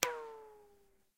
A stereo field-recording of a .22 cal air rifle slug ricocheting off granite. Rode NT-4 > FEL battery pre-amp > Zoom H2 line-in.